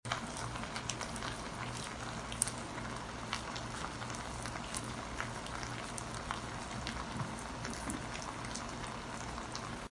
sizzling cooking on stove
Cooking noise. The sizzle of food frying up in a pan.
cook, cooking, fry, frying, kitchen, pan, sizzle, sizzling, stove